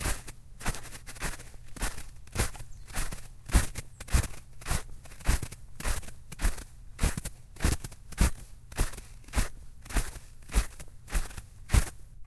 snow footsteps
Some walking in the snow. Loops seamlessly. Recorded with a Roland Edirol R-09HR and edited in Adobe Audition.
feet, snow